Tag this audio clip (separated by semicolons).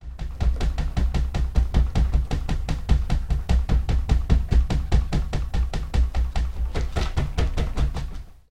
foley; table; trembling